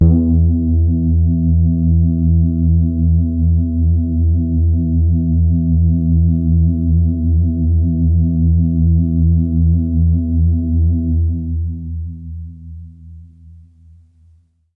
Noisy Mellowness - E2
This is a sample from my Q Rack hardware synth. It is part of the "Q multi 007: Noisy Mellowness" sample pack. The sound is on the key in the name of the file. The low-pass filter made the sound mellow and soft. The lower keys can be used as bass sound while the higher keys can be used as soft lead or pad. In the higher region the sound gets very soft and after normalization some noise came apparent. Instead of removing this using a noise reduction plugin, I decided to leave it like that.
bass, waldorf